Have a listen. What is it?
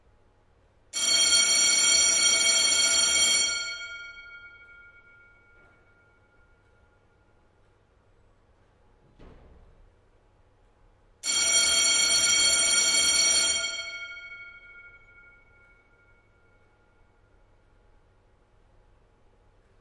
A short alarm school bell recorded in a UK school-hall. Two blasts, some background room-tone with clock ticking.
Mics: SE electronics RN17 as coincident pair
Mic pres/Recorder: Apogee ensemble into Logic